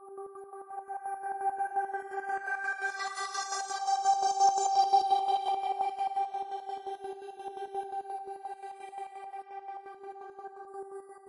Vital Abbysun Gmin 4
Atmospheric pad in G minor 85/170 bpm recorded using Vital VST instrument on Mixcraft DAW, edited with Audacity